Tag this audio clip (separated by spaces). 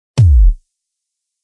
bass bd drum kick synth